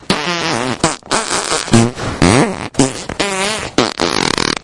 fart montage 6
After effects from my cooking a big pot of navy beans.
gas, fart, flatulation, flatulence, explosion, poot, noise